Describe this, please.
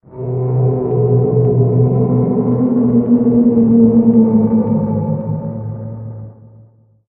rnd moan3
Organic moan sound
Ambience, Atmosphere, Horror, Outdoors